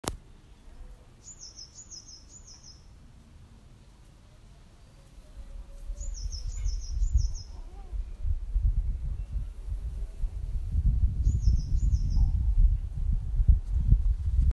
Bird in the garden
Birds, Nature, Park, Peaceful, Singing